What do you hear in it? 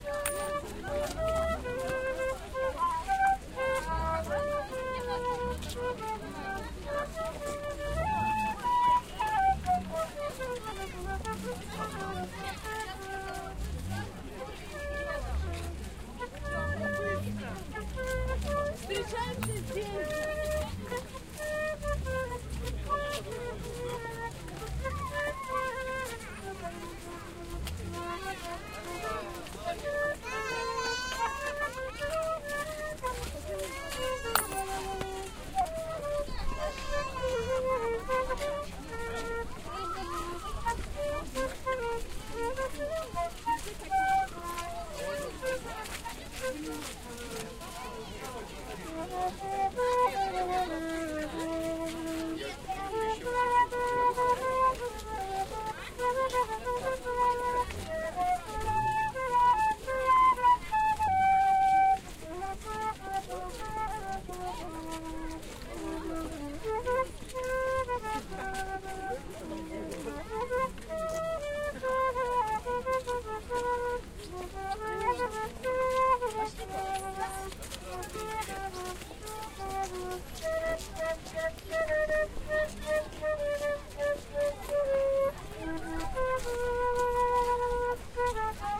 Street musician 1
Street musician play flute for money. Stream of people going past. Somebody lodge money.
Date: 2016.03.19
Recorder: TASCAM DR-40
ambience, atmosphere, field-recording, flute, money, music, musician, noise, people, soundscape, street